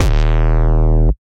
GNP Bass Drum - TPS Report
Long super bass distorted kick drum sound.